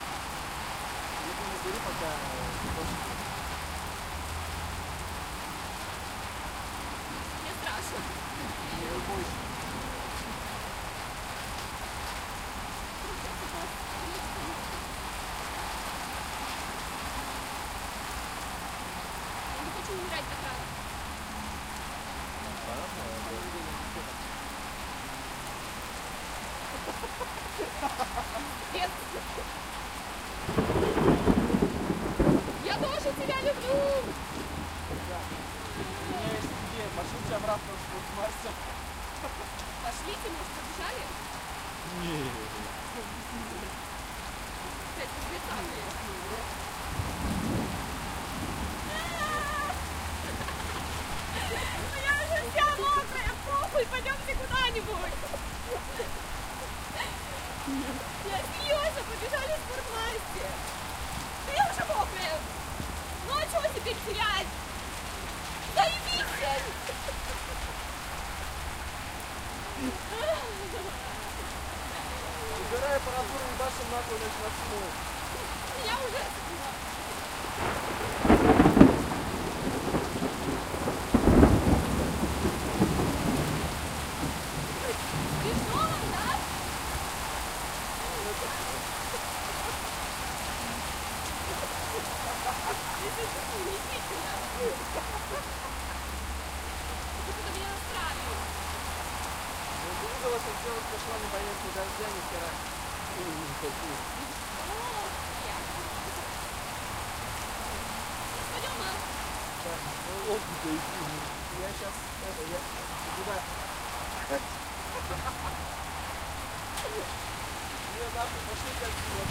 Thunderstorm in the city. Russian peoples are speaks and laughs. Sound of cloudburst. City noise. Cars drive over wet road.
Recorded: 2013-07-25.
XY-stereo.
Recorder: Tascam DR-40, deadcat.